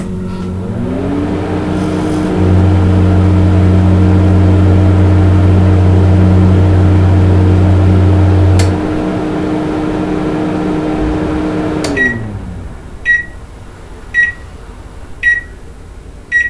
HOME MICROWAVE STARTUP BEEPS 01
This is my old microwave with some really nice beeps at the end recorded with a Zoom H4n.
beeps, home, house, microwave, running, startup